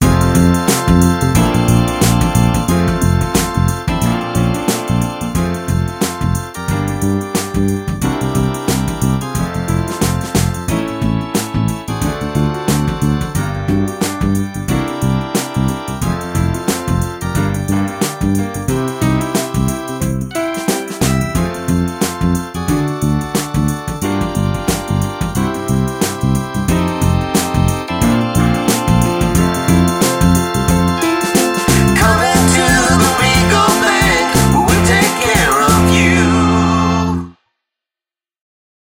regal bank infomercial
This is a clip I created for the play/production 'Chickens'. This clip was used in the scene where a commercial was required and used as a background clip while the actor recited the ad for this commercial.
commercial, bank, tune